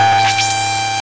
Glitch sounds made with a CD4040 chip.
sound-effect, one-shot, Computer